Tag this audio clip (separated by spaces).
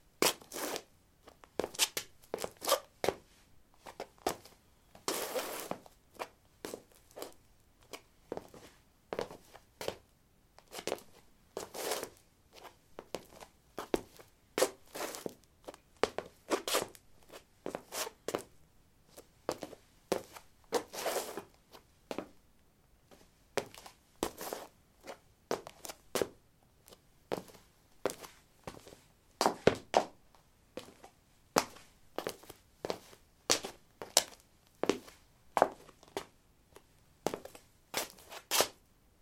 footstep step steps walk walking